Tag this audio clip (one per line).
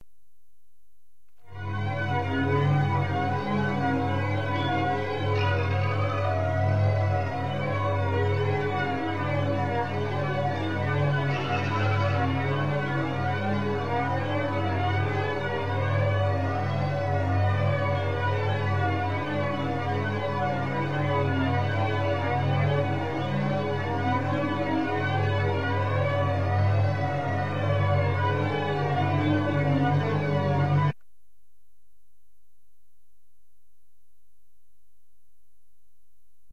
alarm
imaginary
scifi
siren
synthetic